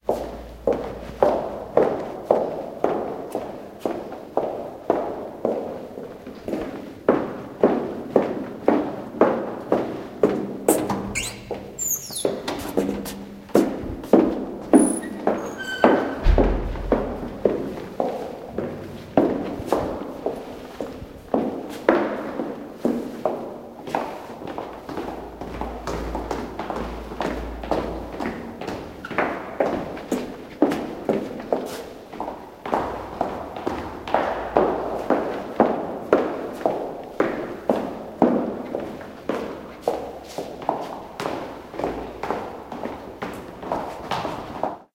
Girl walking using high-heeled shoes.